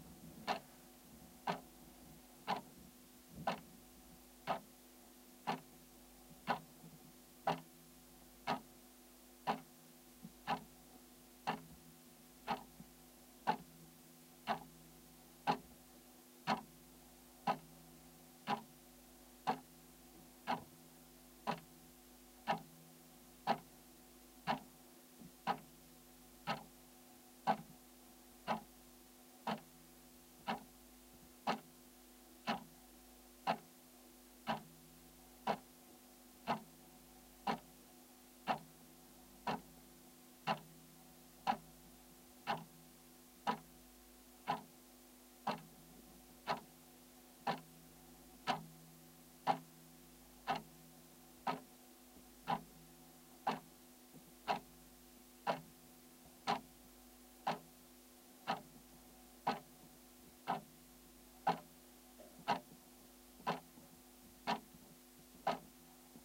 Digital clock recorded with a homemade contact microphone attached to an Olympus LS-14. Edited with Audacity, though the only editing has been clipping material at either ends, there has been no shortening or removing of sections between ticks to preserve the intervals.